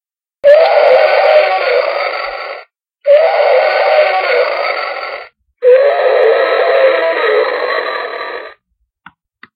Angry Dinosaur
Made with sounds from various farm animals and jungle animals. There's also a car noise. I think it turned out really well!
dragon, roar, prehistoric, screech, scream, dino, horror, animal, creepy, monster, beast, terror, dinosaur, scary, creature